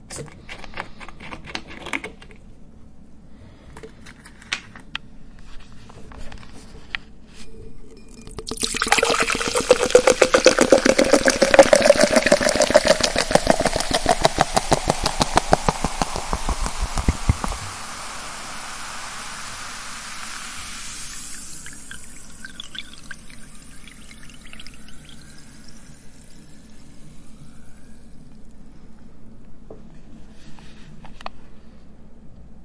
Pouring 20-Oz Soda Into Big Root Beer Mug
Redorded with I don't know what at the time, this is a 20-Oz Root Beer, being poured into a Root Beer mug.
20Oz, Poured-Into-Cup, Root-Beer, Root-Beer-Mug, Soda